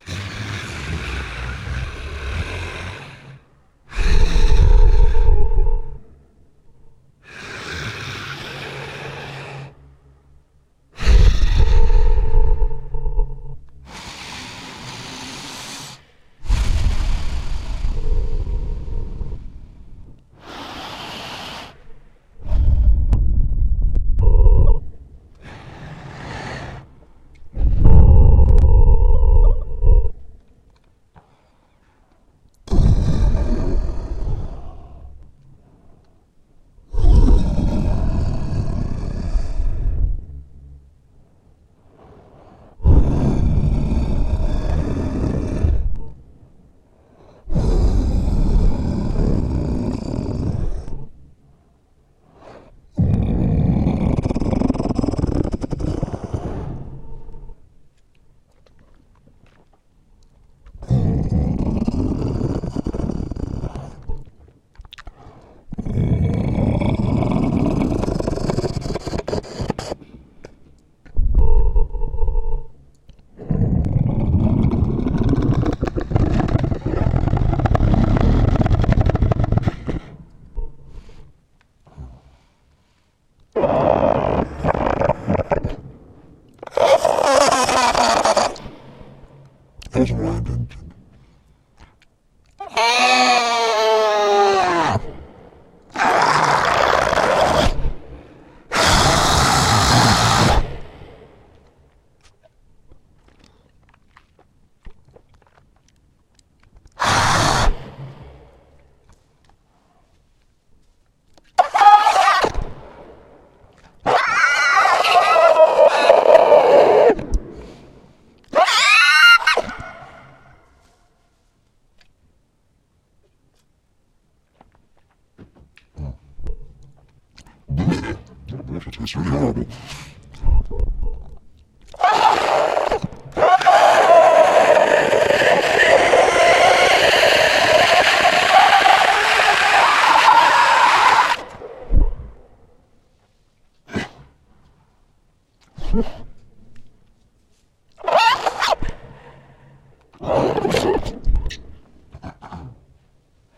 Large monster breathing, growls and screeches
Noises I made with my mouth into a Superflux PRO-268A and then heavily processed using compression, multitracking and messing around with formants and pitch.
The first 30 seconds or so are breathing noises, the final minute or so is screeches, the middle is growling.
At some point in the future I'll cut these files up into smaller pieces and remove irrelevant parts.
beast, breathing, creature, demon, growl, monster, processed, roar, screech, vocal